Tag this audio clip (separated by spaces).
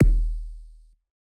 TECHNO MORLEY BOSS EQ-10